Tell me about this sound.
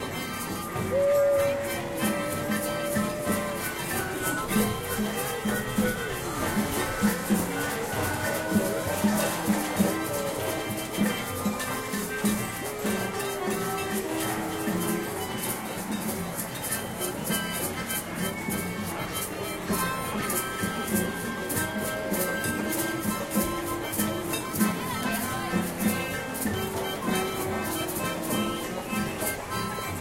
people partying and playing music